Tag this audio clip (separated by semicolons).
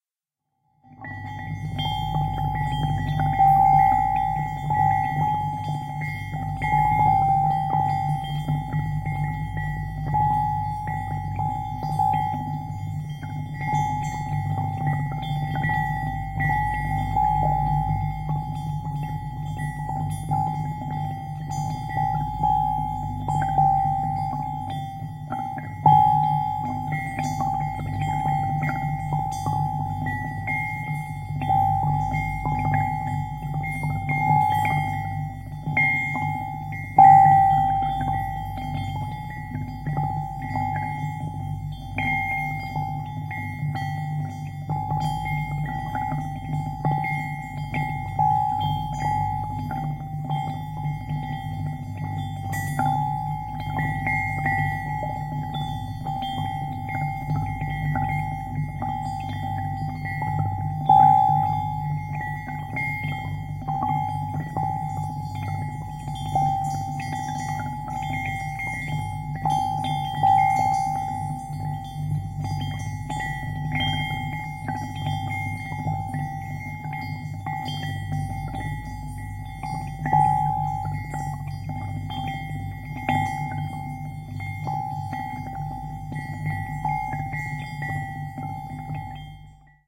bells; ding; ring; stereo; water; windchime